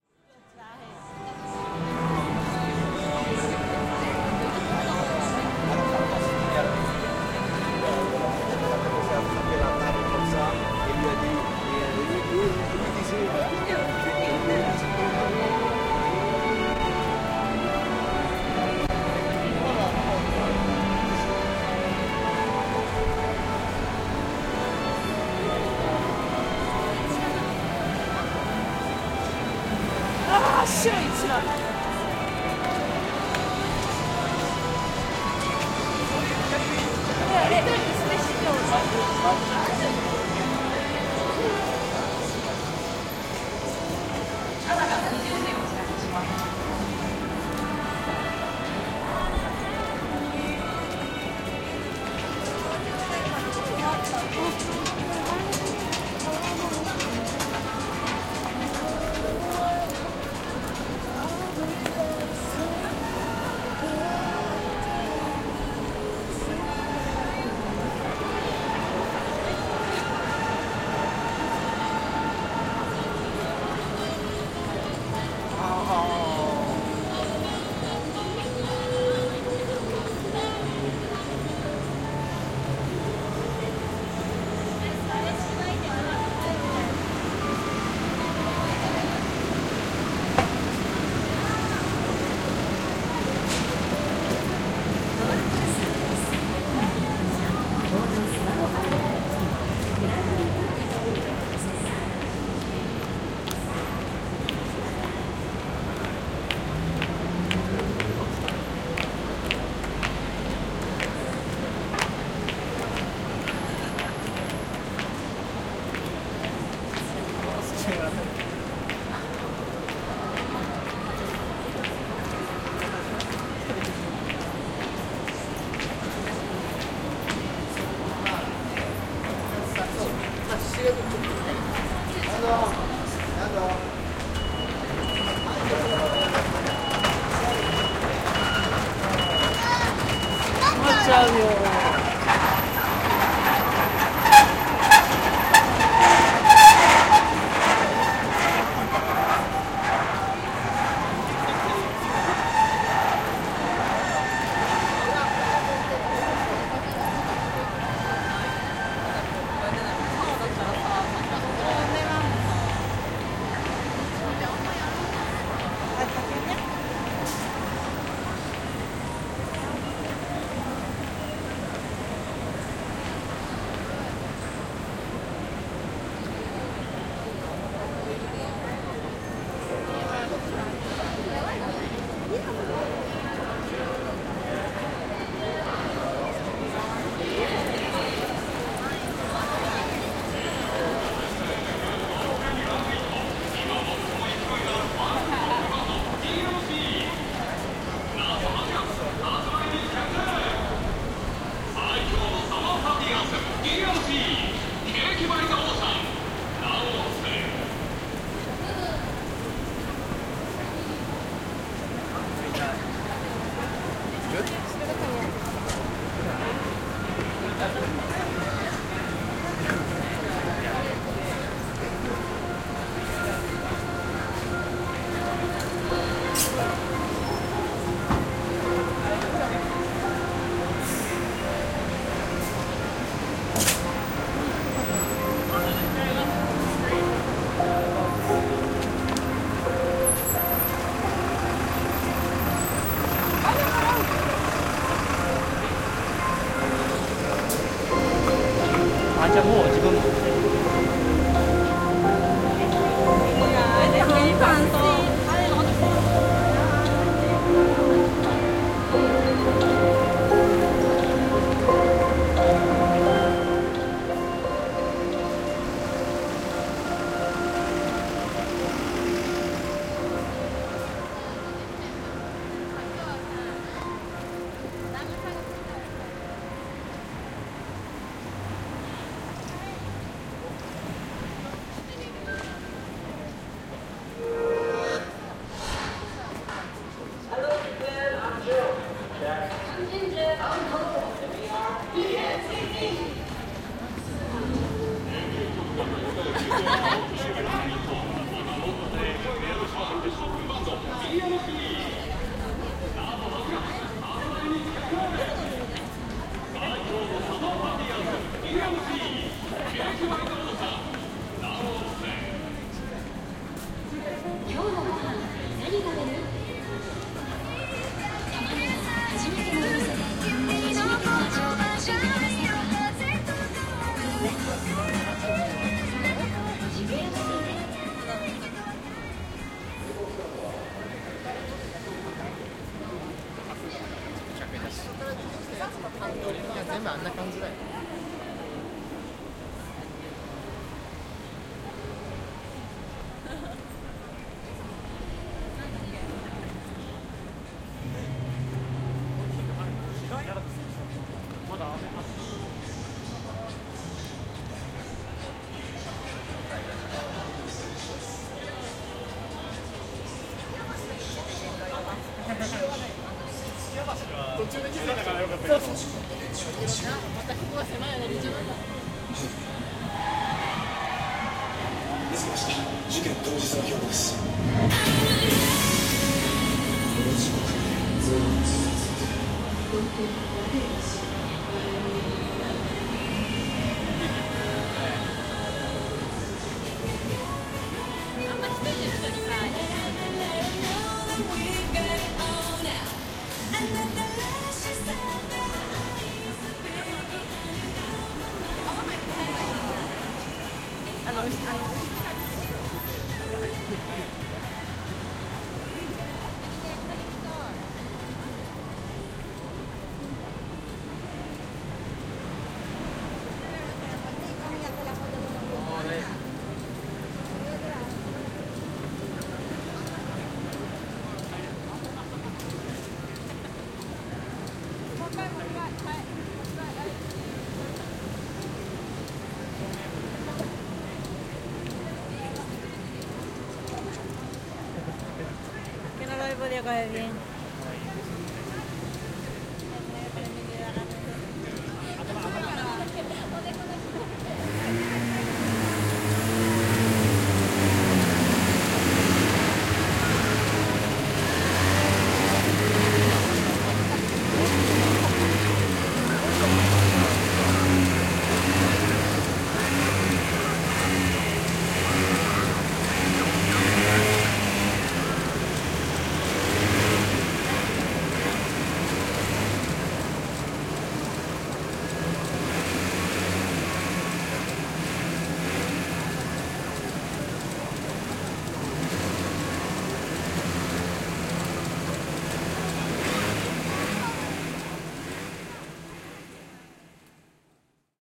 Shinjuku - August 2016
Having a walk in Shinjuku
Recorded with a Zoom H6 in August 2016.
ambience, atmosphere, background, field-recording, Japan, music, people, Shinjuku, shops, soundscape, street